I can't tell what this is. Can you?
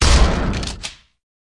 Original Gun sound Design using metal gates, wooden blocks, and locks.